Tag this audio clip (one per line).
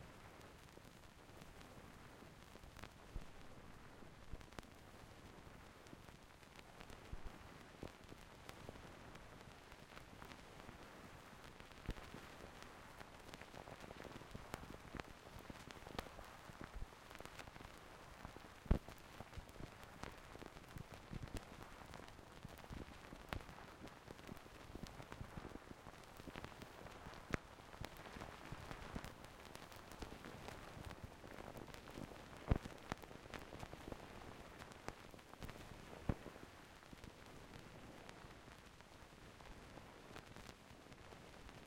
crackle
vinyl